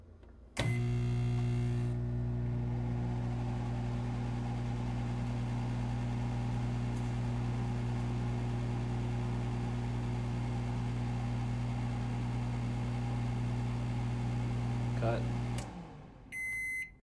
recording of a micro-wave